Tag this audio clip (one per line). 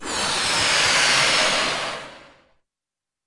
balloon
inflate